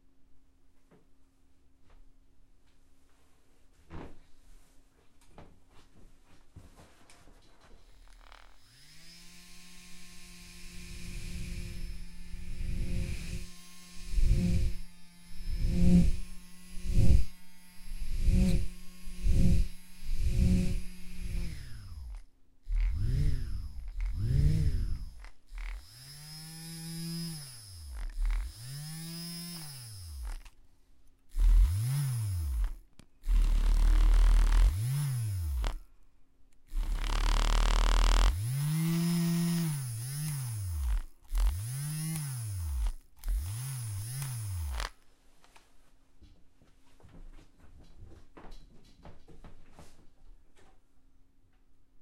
mini whisk fx
some foley of an electric hand held whisk-lots of close blade whirring and movement
electric, rotor, whirr, whisk, fan, handheld, blades